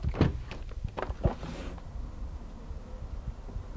window open double glazed outside noise 001
A double glazed window being opened, plus a small amount of noise from outside.
glazed, window